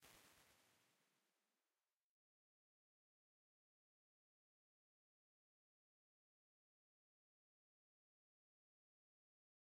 QV Hall dec60 diff4
Quadraverb IRs, captured from a hardware reverb from 1989.
IR, impulse-response, FX, convolution